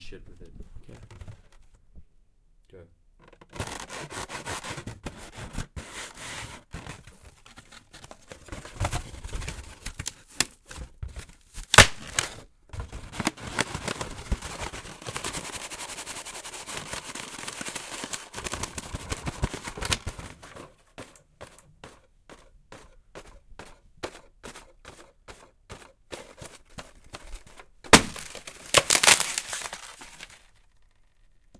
This is polystyrene being played around with, it could sound like paper being rubbed together and like cardboard being broken
breaking, rubbing, squeezing, Polystyrene, smashing